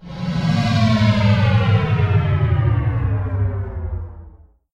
Sc-fi Machine Power-Down
A Sound Effect of a Sci-Fi Generator slowly coming to a stop. also useful for Alien Space-Crafts.